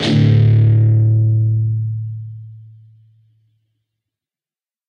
Dist Chr A&D strs pm
A (5th) string open, and the D (4th) string open. Down strum. Palm muted.
chords, distorted, distorted-guitar, distortion, guitar, guitar-chords, rhythm, rhythm-guitar